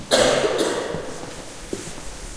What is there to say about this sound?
somebody coughs twice. Olympus LS10, internal mics
cold, field-recording